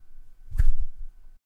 11 -Movimiento rapido
sonido de algo moviendose rapido
foley, movimiento, rapido